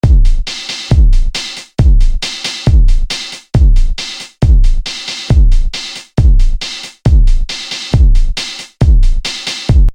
Energy Beat 3000
techno, rap, good, guns